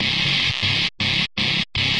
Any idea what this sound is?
120 Dertill n Amp Synth 01
bit, synth, digital, crushed, dirty